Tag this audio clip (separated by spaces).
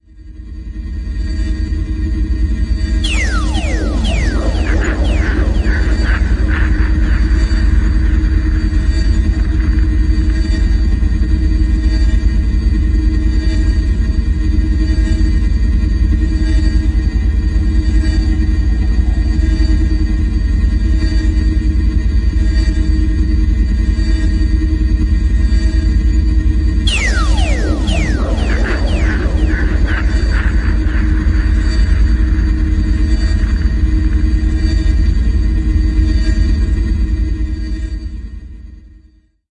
glitch hit horror transformer futuristic metalic moves cinematic dark background game abstract atmosphere impact woosh morph rise opening stinger transformation Sci-fi scary transition destruction noise metal drone